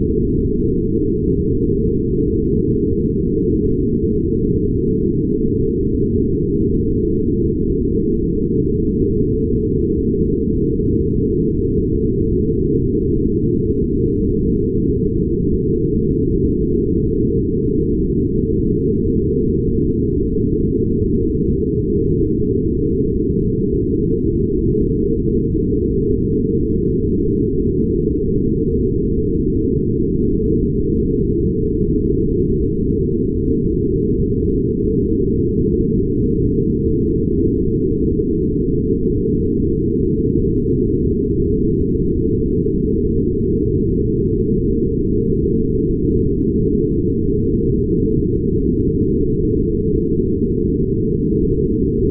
Loud Silence
Noise of a filesystem into space.
Edited with Audacity.
hollow, time, atmosphere, breathing, ambiance, regular, air, alien, background, ambience, spaceship, breath, planet, slow, aura, space, ambient